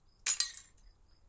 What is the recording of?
A glass being hit and shattering. High pitched, single hit and break. Small glass.